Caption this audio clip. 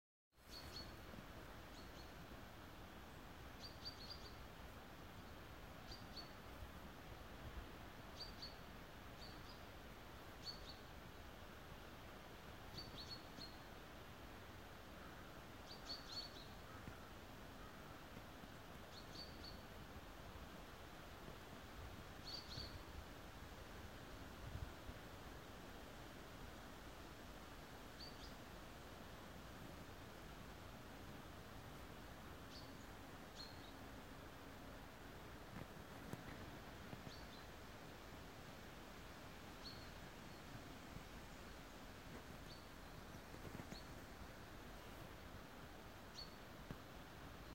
Morning birds waking up on a Sept morning in a seaside forest in Helsinki, Finland.
birds, nature, wind